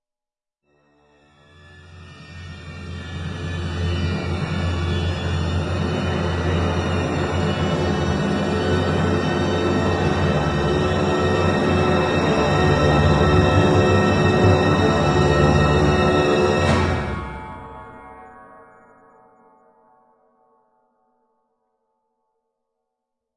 Robo Walk 05G
A giant robot taking a single step described using various instruments in a crescendo fashion.
Cluster, Orchestral, Suspense